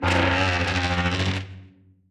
Processed recordings of dragon a chair across a wooden floor.